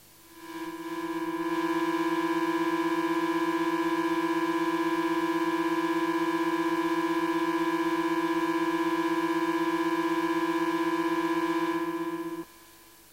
recorded with induction coil